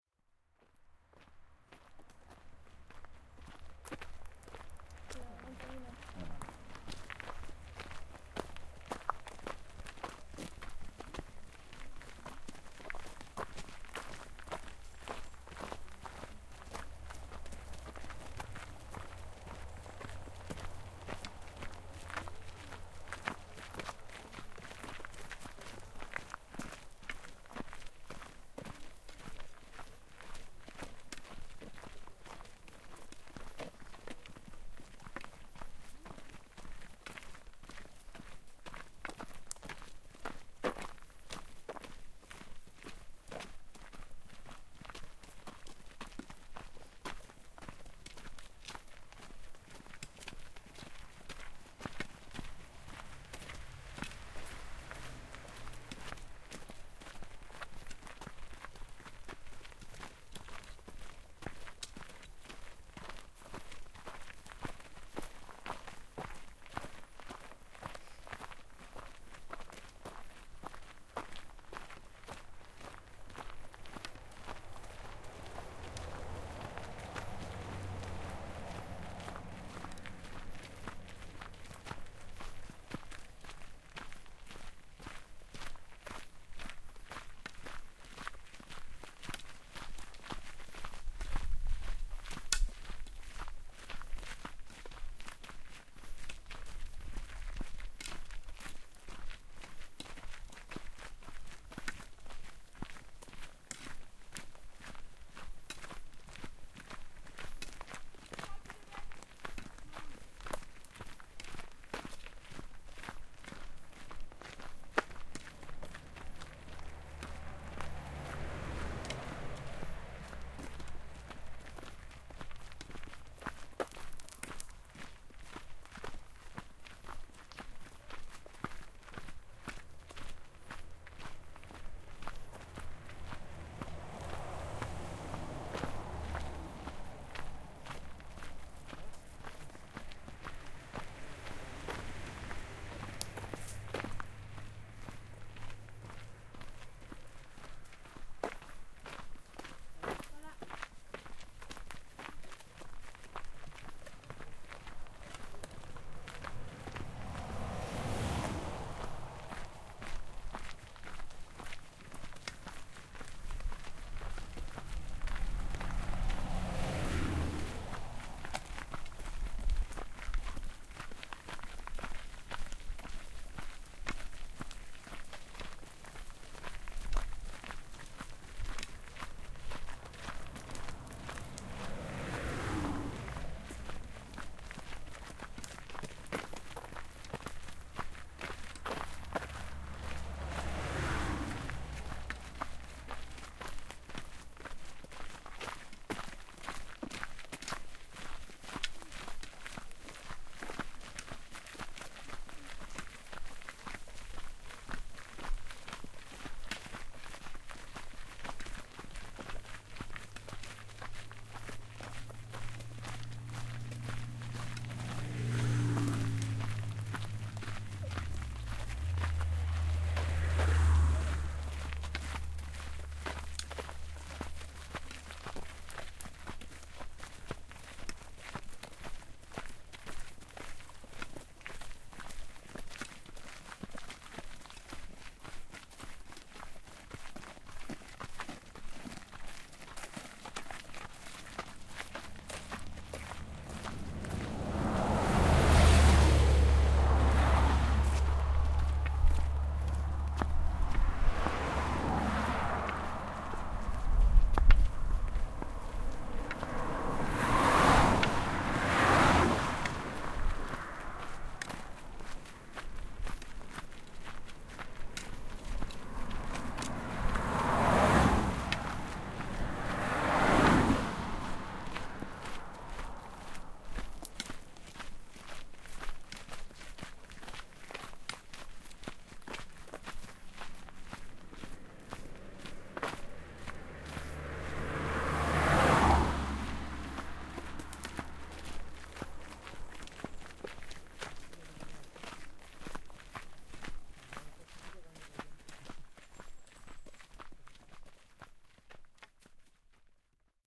Walking to Palas de Rei
31/7/2011 - Second day
This is the most common sound in Camino de Santiago (even more than the snores and the plastic bags of the previous sound): footsteps, people walking. In this recording we are walking from Portomarín to Palas de Rei (25 km). First we're surrounded by a forest but little by little we're approaching a road and we begin to hear all cars passing by.
Right at the beginning of the recording (0:04) it can be heard a girl saying "buen camino" (in spanish) which is the typical greeting in the camino.
This recording was made with a Zoom h4n.
cars, field-recording, forest, footsteps, walking, road